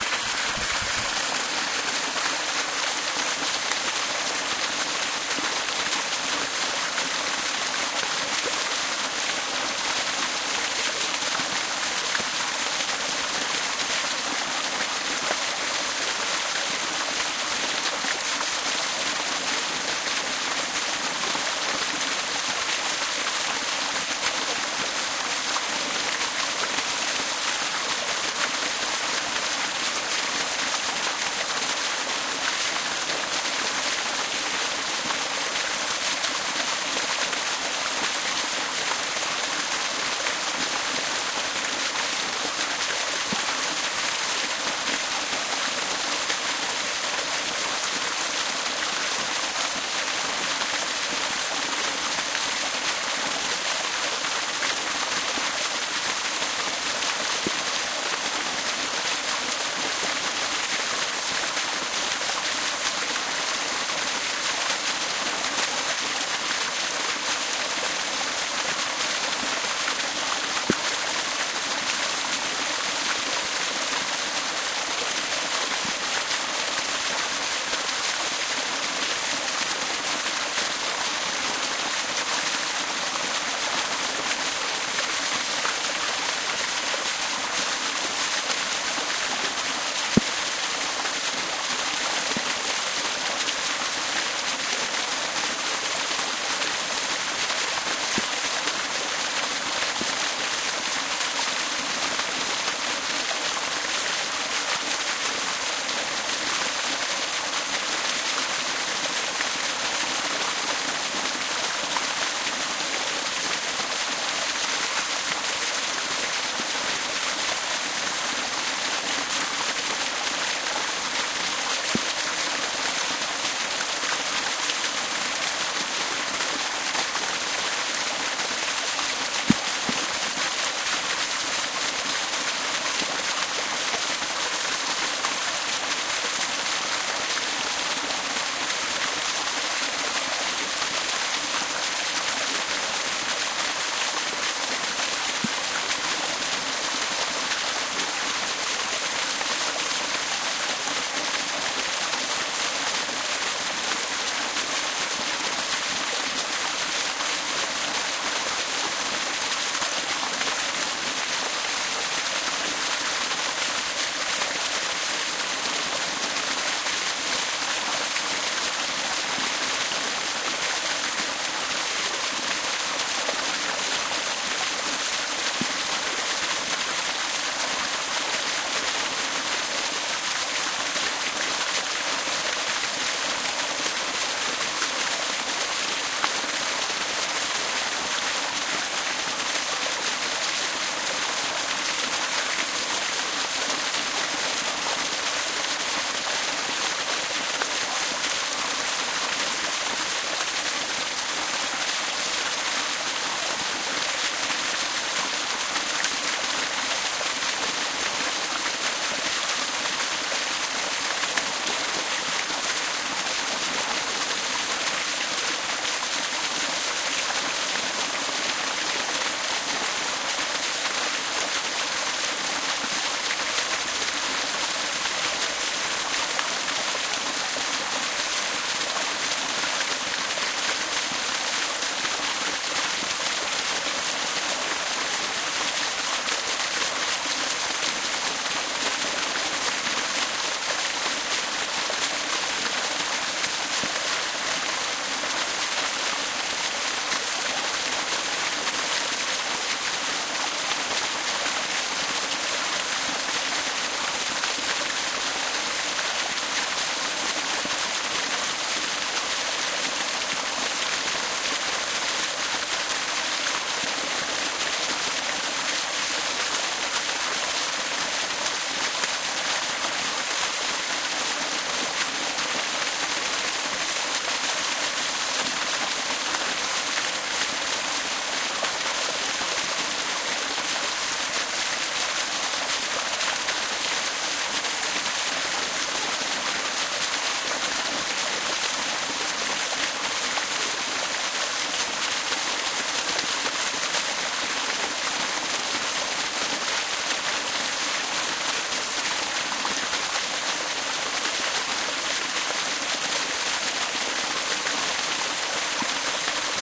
waterfall in the forest rear
waterfall in the forest
waterfall, water, forest, field-recording, nature, stream, river